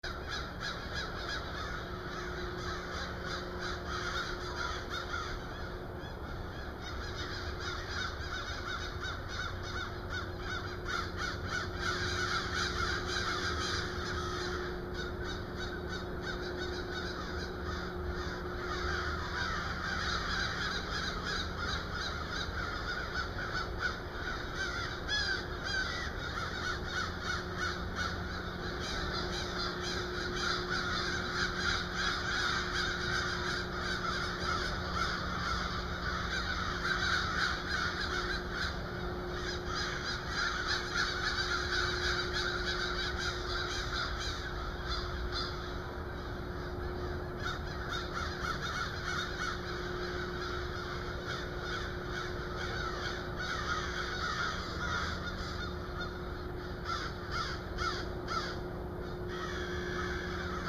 Crows cawing at each other in the early morning.
crows, nature, morning, birds